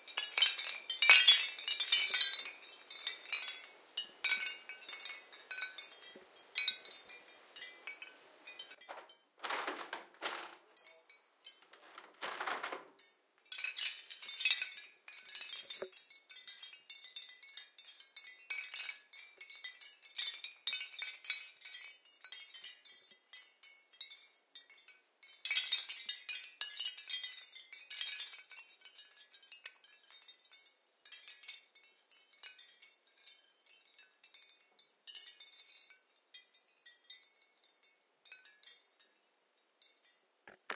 Sound of a ceramic wind chime
ceramic, chime, wind